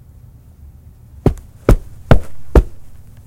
intimidating walking Footsteps boots carpet
A man walking angrily on carpet in boots.
carpet, boots, footsteps, male